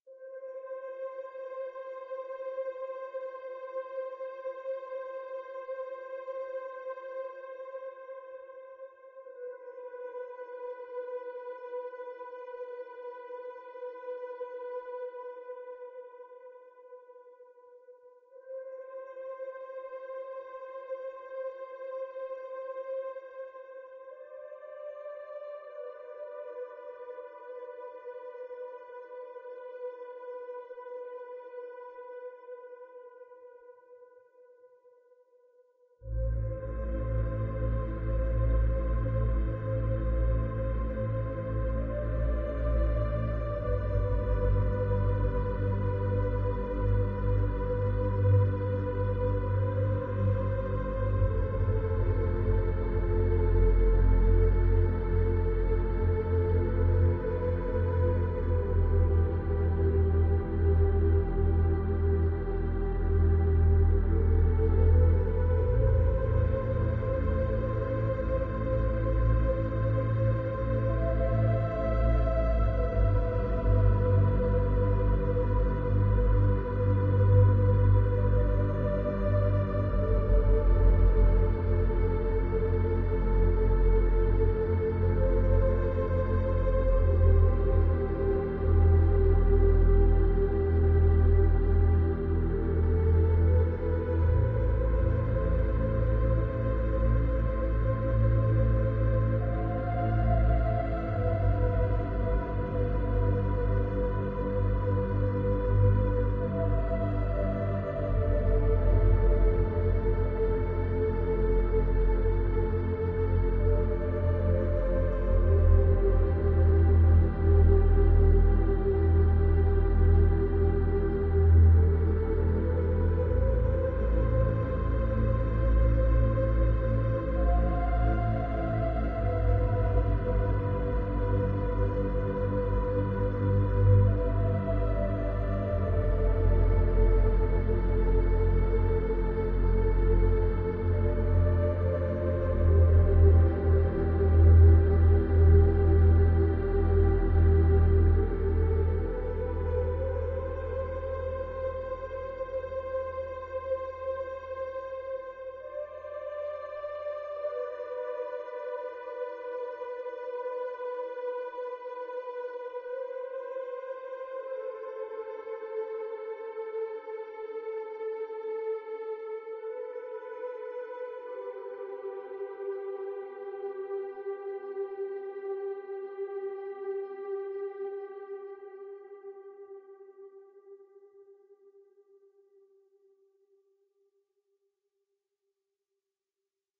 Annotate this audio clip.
Relaxation music #60
Relaxation music made for various purposes, No need to ask for permission it's free for everyone!
Created by using a synthesizer and recorded with Magix studio. Edited with audacity.